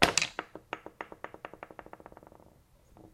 Heavy object rocking on concrete
crash, chaotic, objects, clatter